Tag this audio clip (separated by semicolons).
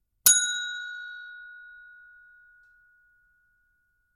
signal; fostex; ntg3; bell; buzzer; gong; boardgame; fr2le; toy; rode